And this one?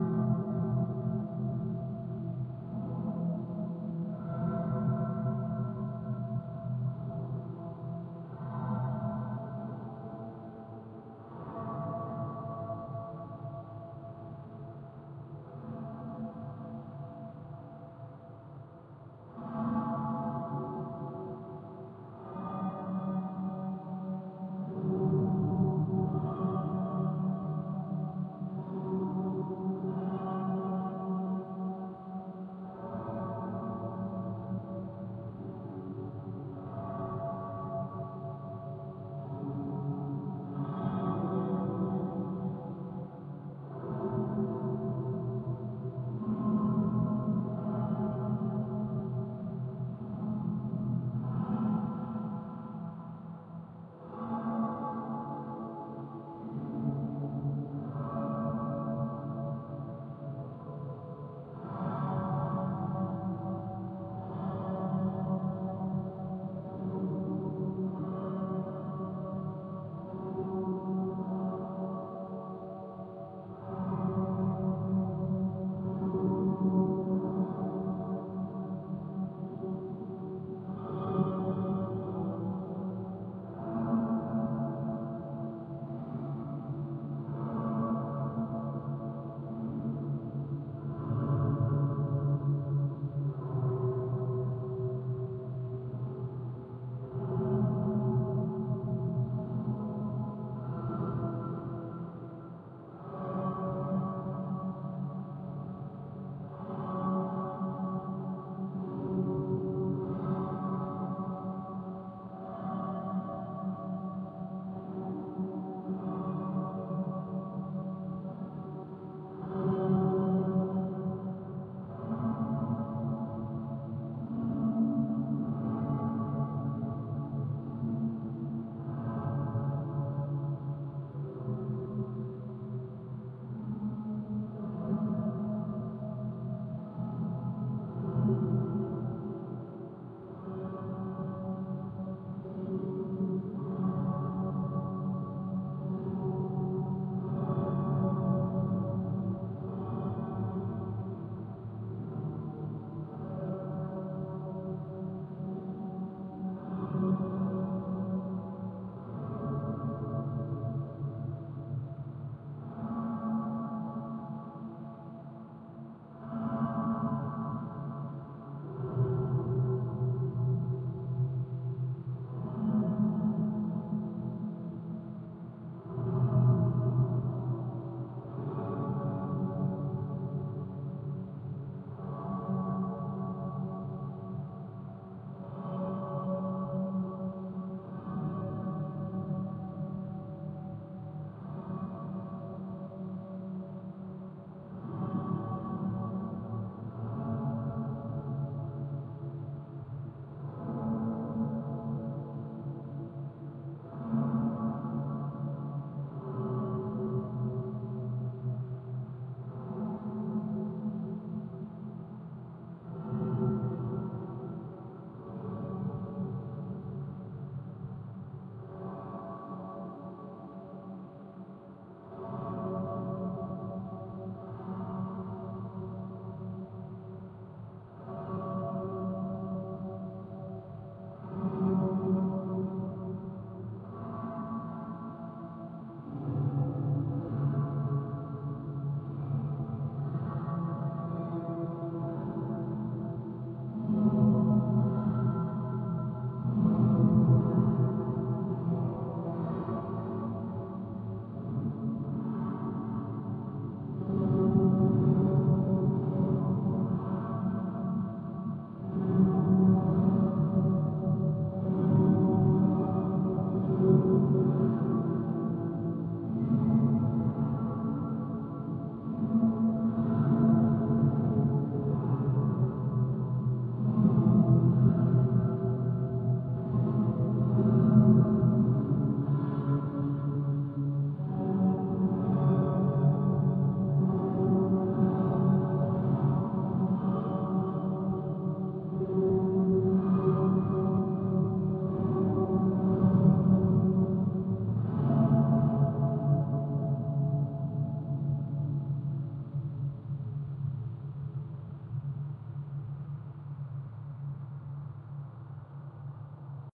Peaceful Drone
Drone created from a recording of my playing ukulele. Used Paulstretch, Echo, and Pitch Change.